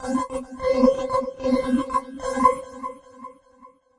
THE REAL VIRUS 11 - VOCOLOOPY - C5
A rhythmic loop with vocal synth artifacts. All done on my Virus TI. Sequencing done within Cubase 5, audio editing within Wavelab 6.
multisample, vocoded